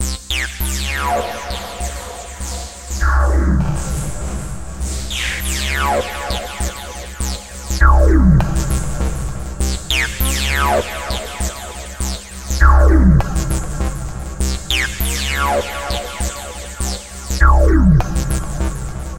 Synth Bass loop rooted in C tempo 100.